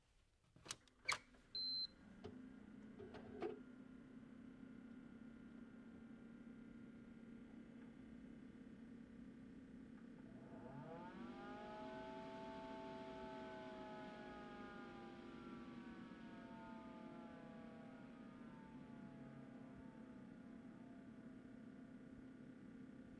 Spouštění PC
Sound of starting PC